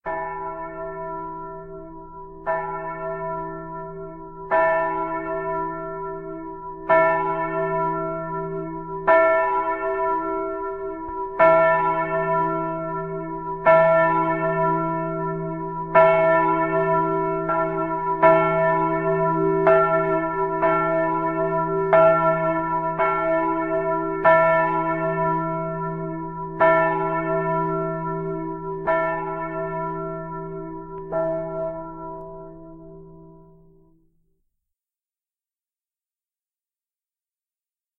Here is a swinging Flemish bell. This bell can be used to call people to church, or for anything else. Hope you enjoy.
Bells, Carillon, Church